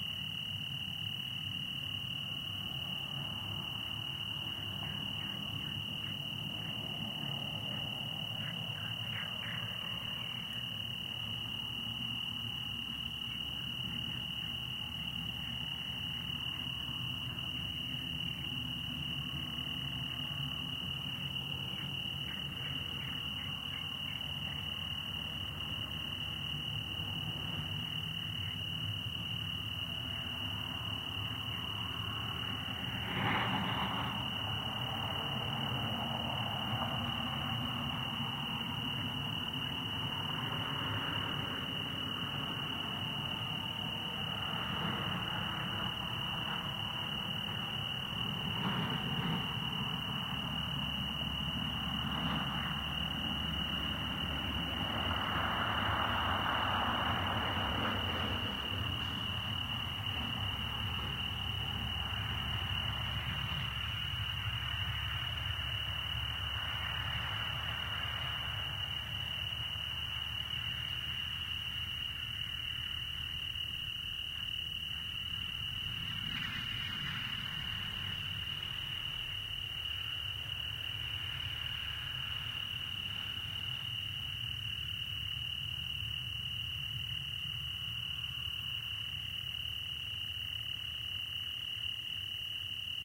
21062007.night.passing.car
night ambiance in scrub near Donana National Park, S Spain. A car passes along a dirt trail, with crickets and Nightjar calls in background. Decoded to mid-side stereo with free VST Voxengo plugin, unedited otherwise.
ambiance, birds, field-recording, nature, south-spain, summer